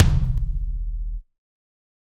Kick Of God Wet 029
kit, drum, pack, set, drumset